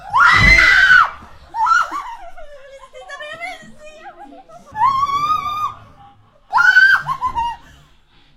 Scary scream 4
Another scary scream.